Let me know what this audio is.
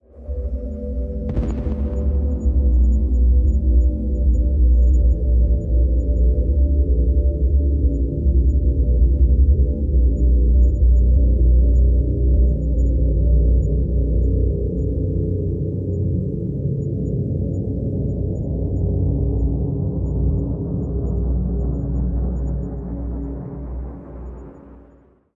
ambient 0004 1-Audio-Bunt 11
tracker, rekombinacje, lo-fi, electronic, bunt, NoizDumpster, space, breakcore, lesson, glitch, drill, DNB, noise, synthesized, square-wave, ambient, VST, digital, loop, synth-percussion, harsh